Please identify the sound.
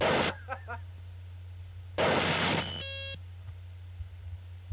A kit Made with a Bent Yamaha DD-20 Machine